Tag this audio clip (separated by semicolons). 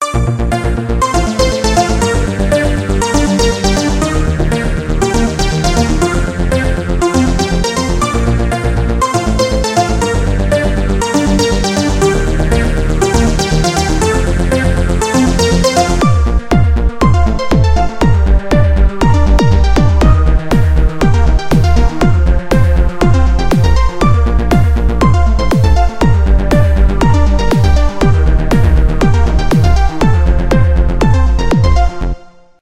LemonDAW; Software; Tracker